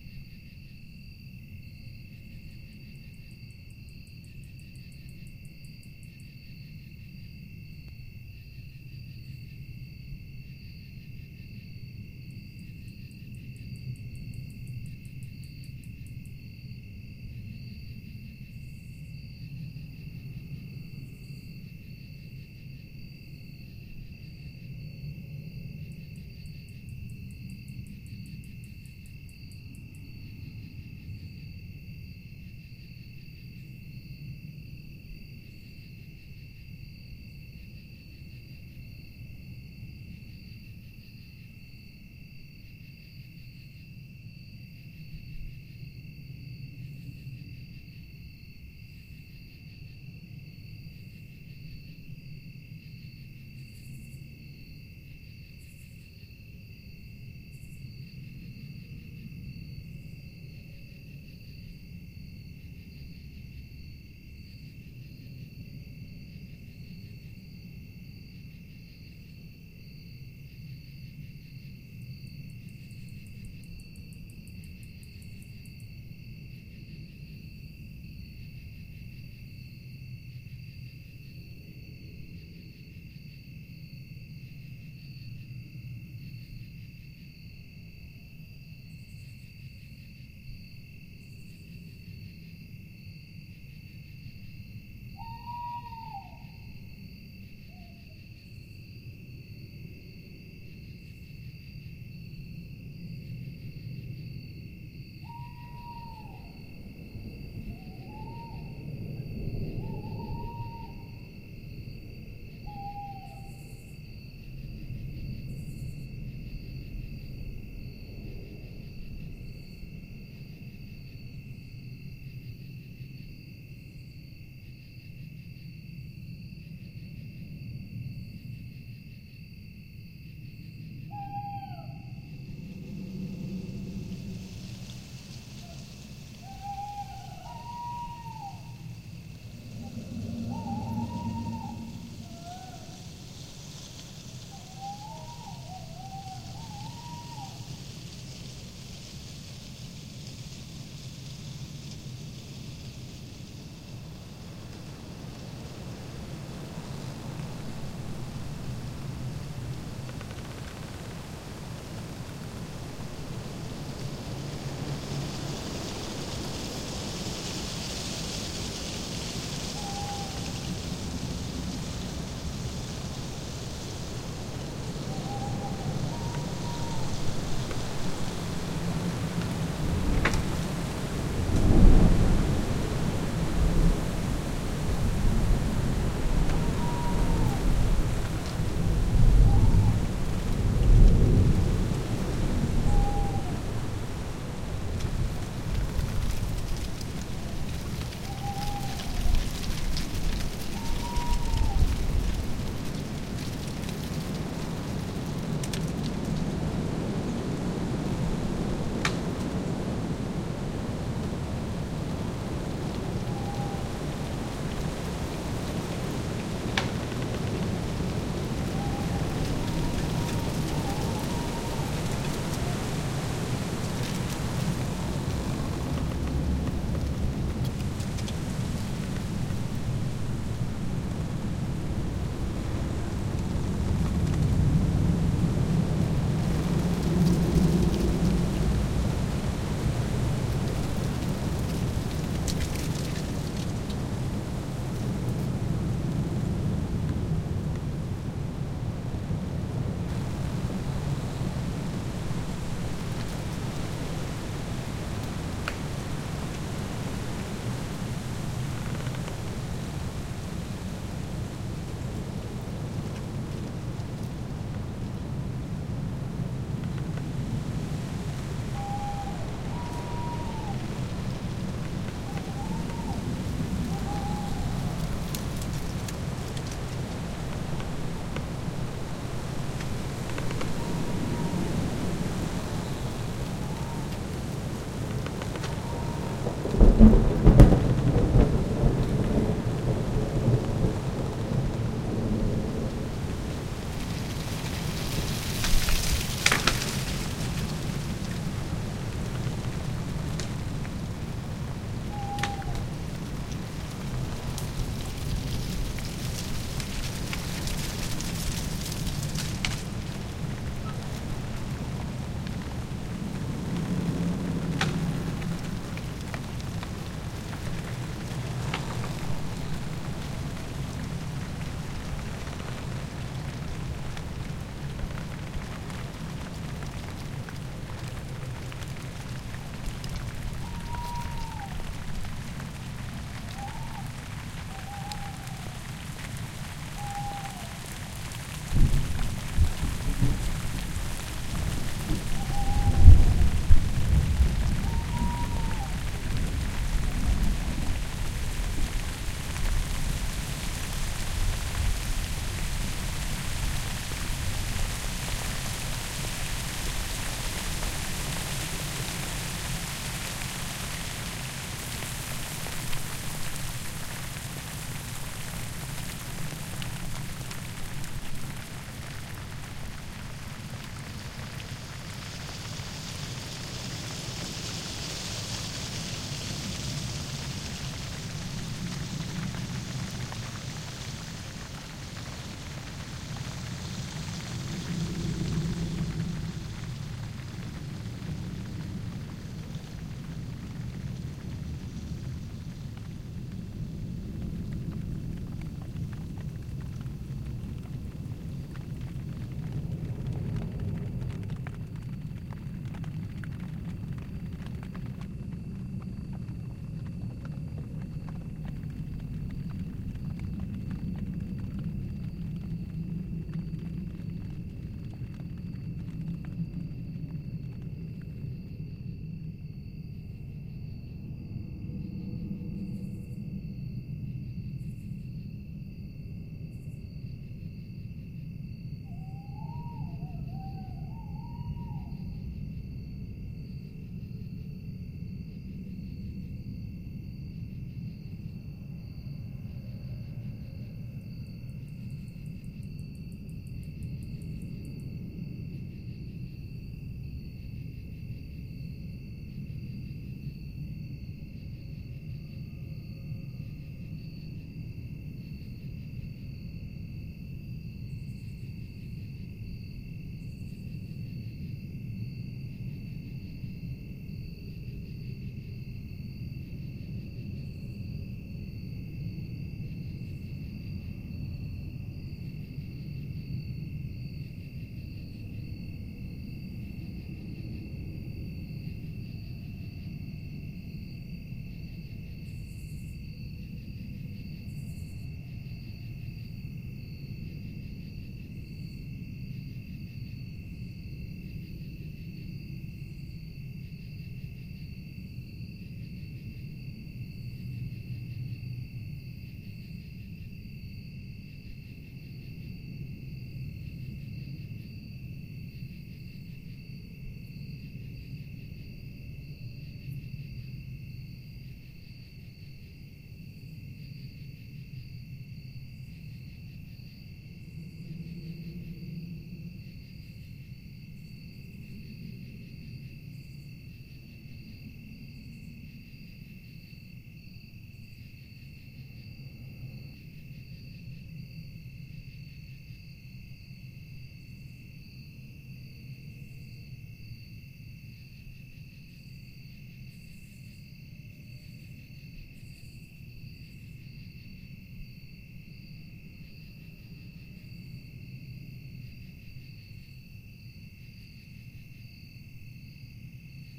It is intended as background atmospheric sound. I find it stands by itself, so I am uploading it as is, though I added more layers to it as "scary night complete". Loops, cycling from calm night through a windy, brief storm. Really ought to be longer, but...
Formed from:
171984__kyster__massive-hail-and-two-deep-thunderbolts-2012-11-02
191934__klankbeeld__creacking-oak-6bft-short-130418-00
23405__neilg__rainonleaves-june2006
245824__1ratatosk1__nightscapes-asplund-ett-min
253535__kvgarlic__windblownleavesskitteringacrossasphaltoct31st2014
267551__yoyodaman234__thunder2
267550__yoyodaman234__thunder3
267549__yoyodaman234__thunder4
272322__klankbeeld__wind-in-willow-02-contact-mic-150426-0644
62488__sagetyrtle__1103octobernight
83985__inchadney__owls
The two main tracks are 62488__sagetyrtle__1103octobernight (a lovely autumnal evening) and 272322__klankbeeld__wind-in-willow-02-contact-mic-150426-0644 (which I stretched in Audacity for the underlying groaning as well as using as is).